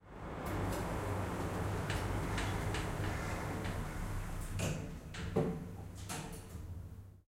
elevator door close 7a
The sound of a typical elevator door closing. Recorded at the Queensland Conservatorium with the Zoom H6 XY module.
mechanical, closing, sliding, lift, opening, open, elevator, close, door